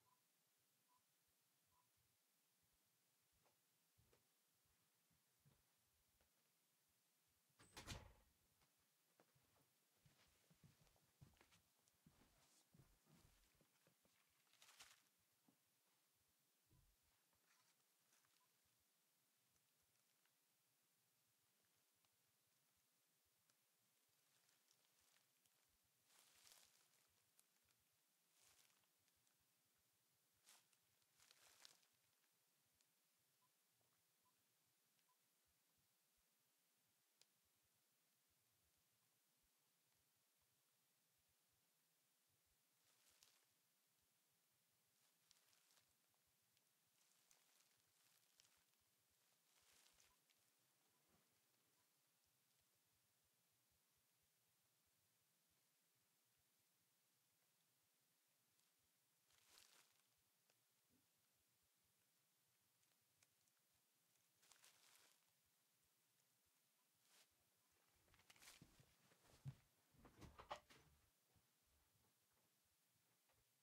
Leaf shift 4
Paper, Rapping, Leafs